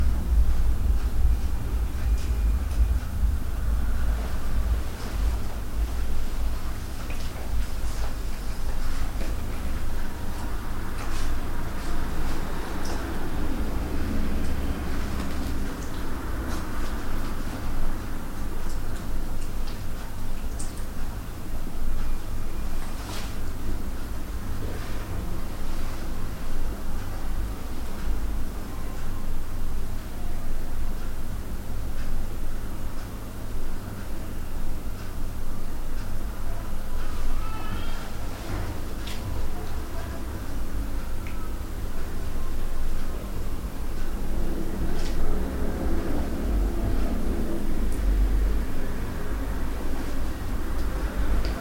indoors ambient room tone 1
indoors, room, tone, ambient